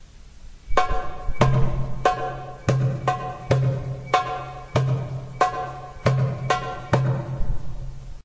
Btayhi Msarref Rhythm
Two cells of Btayhí msarref (light) rhythm of the moroccan andalusian music
orchestra, arab-andalusian, btayhi, derbouka, msarref, percussion, compmusic, moroccan, andalusian